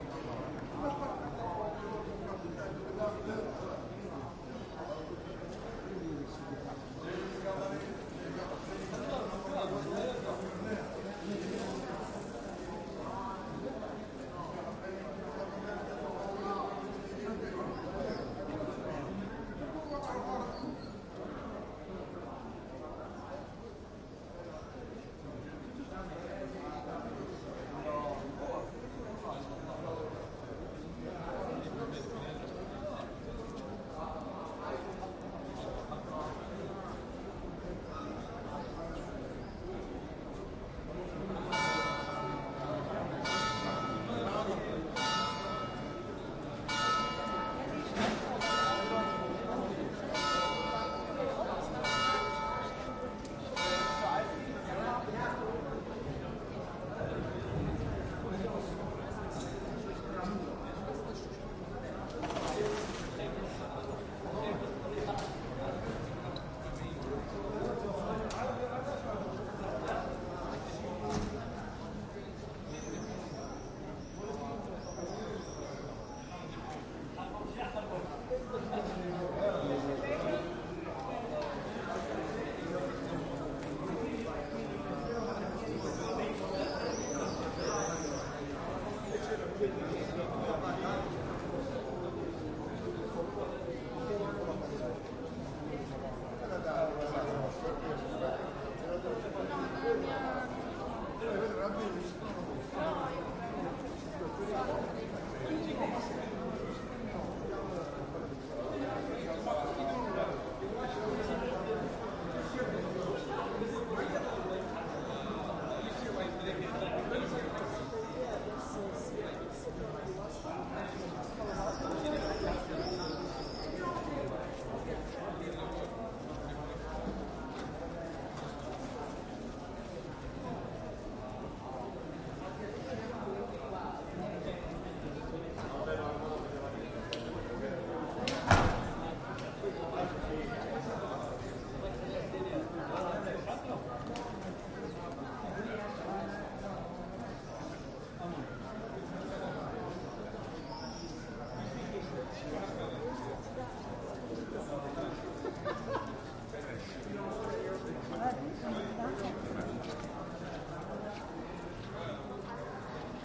This ambient sound effect was recorded with high quality sound equipment and comes from a sound library called Italy - Sicilian Ambients which is pack of 36 audio files with a total length of 287 minutes. It's a library recorded in Trapani, a beautiful city in Italy, and I've recorded there a lot of interesting ambients.